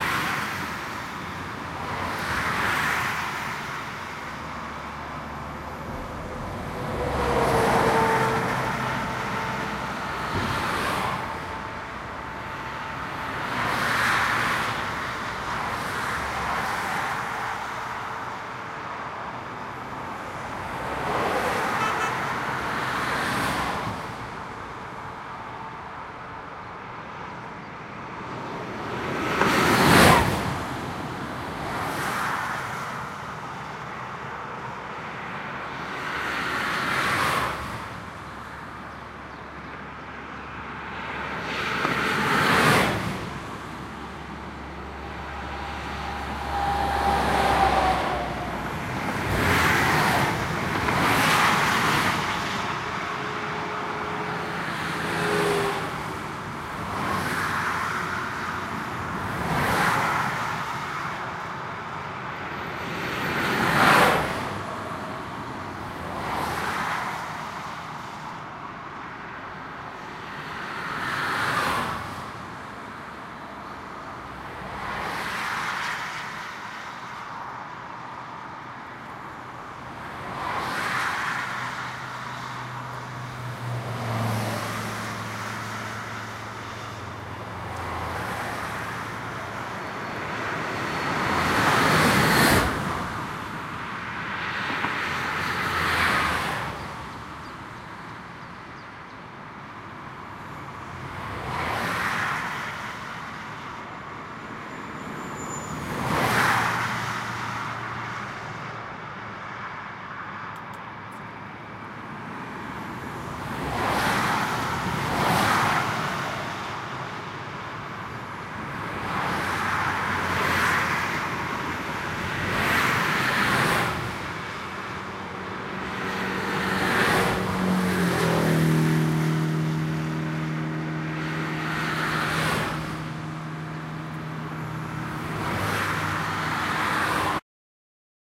Recorded on a bridge crossing a highway (Autobahn) with a Zoom H2n (x/y + M/S).